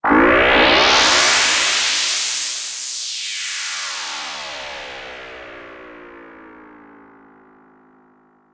supernova fx
sampled from supernova2 synth with hardware effect processing chain.
fx, synth